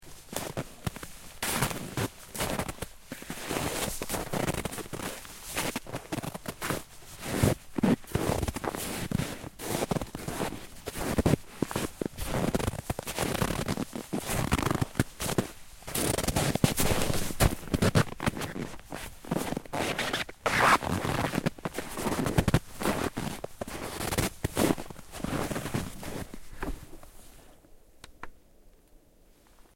crunching snow
Walking through crunchy snow.
crunching, crunchy, footsteps, ice, snowy, walk, winter